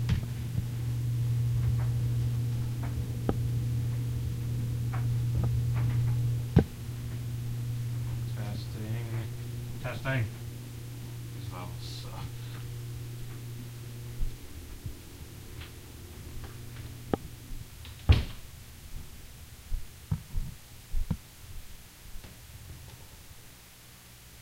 Testing the contraption made with headphones to make binarual recordins.